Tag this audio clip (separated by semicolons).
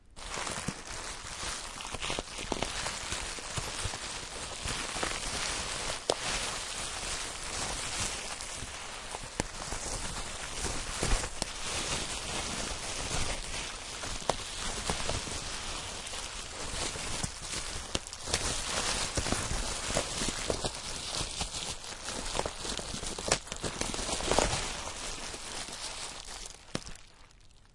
rip; scratch; rustle; bublerap; bubble